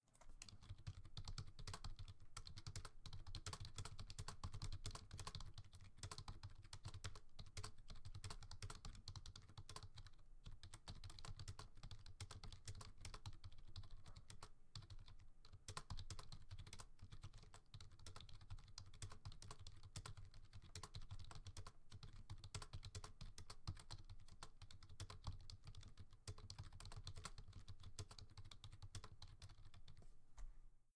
Me typing some of the lyrics to All Star by Smash Mouth. I know the lyrics well enough, so I can quickly type it without break. Good for videos with a looped typing animation.
fast-typing, keyboard, keystroke, type, typing